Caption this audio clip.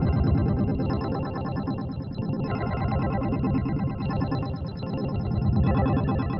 remix of a downtempo beat added by Zajo (see remix link above)
spacey filter and vocoder